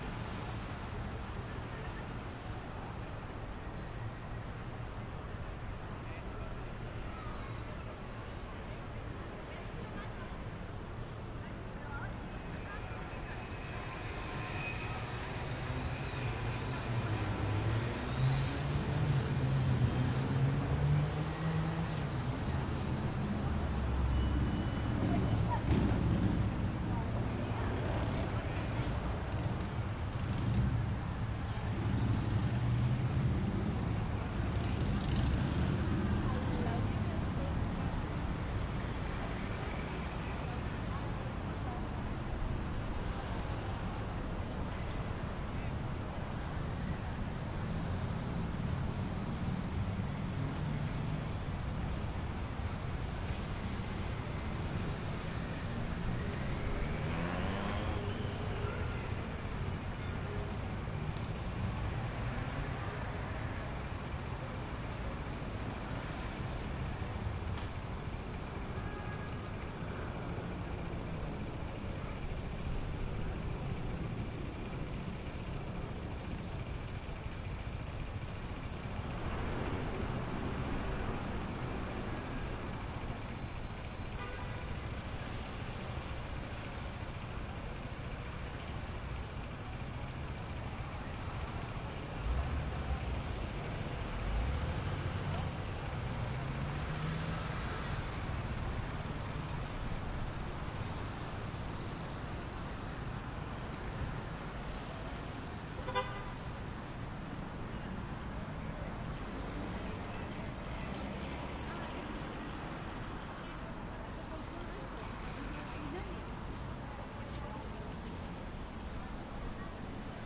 Calidoscopi19 Virgiana Woolf 3
Urban Ambience Recorded at Virginia Woolf Gardens in April 2019 using a Zoom H-6 for Calidoscopi 2019.
Calidoscopi19, Congres, Humans, Monotonous, Nature, Pleasant, Quiet, Simple, SoundMap, Traffic